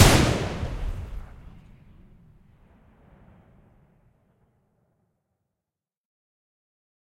Combined various sounds to get a gunshot sound. Can be used about anywhere.
armed-forces, army, battle, crime, explosion, fire, fired, forces, gunshot, marine-corps, marines, rifle, rumble, shoot, shot, sniper, soldier, training, war